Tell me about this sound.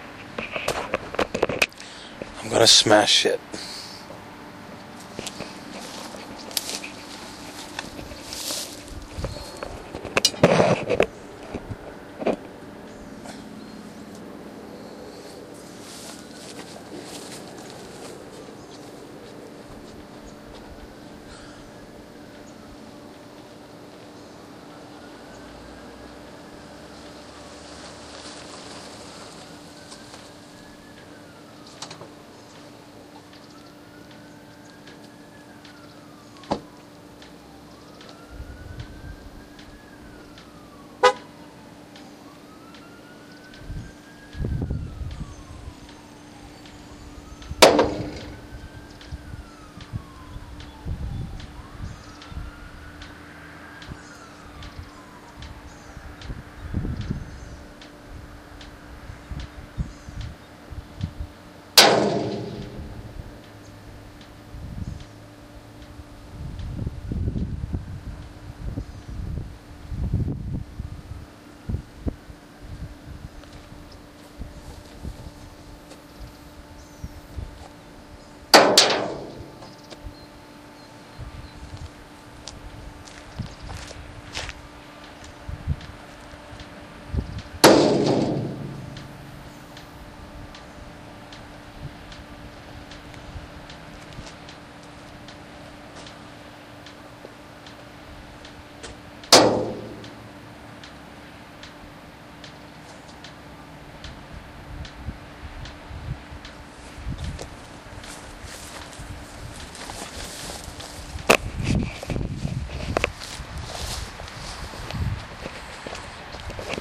Some files were normalized and some have bass frequencies rolled off due to abnormal wind noise.
field-recording, stereo